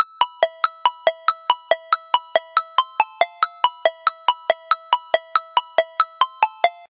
18 ARP 2 8VA
3, cell